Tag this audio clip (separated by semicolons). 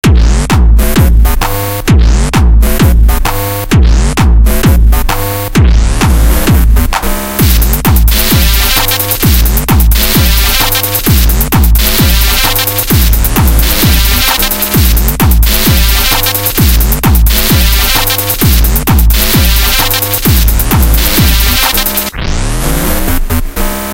space; dance